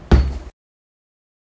Hahn Kick
One sound taken from the 'Microblocks, vol.1' percussive found sound sample-pack. The sample-pack features 135 unique field recordings culled from the ordinary soundscapes of Santa Cruz, CA.
ambience, city, field-recording, kick-drum, noise, sample-pack